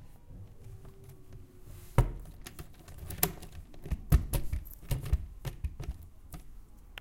Sonic Snaps GEMSEtoy 4
Switzerland, home, snaps, sonic, sounds